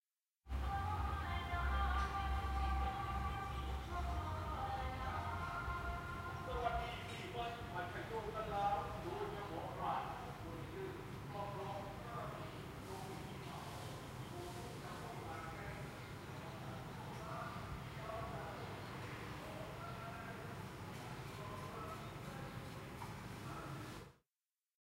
Hotel Window Krabi town Thailand Ambience...
Thailand, ambience, recording